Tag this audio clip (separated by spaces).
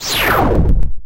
BFXR digital game sound-effect video-game